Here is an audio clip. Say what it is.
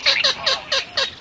funny bird call (maybe from Great Reed Warbler). Recorded with Sennheiser MKH60 + MKH30 into Shure FP24 and Olympus LS10 recorder. Donana National Park, S Spain
duck, marshes, south-spain, funny, call, spring, field-recording, bird, nature, donana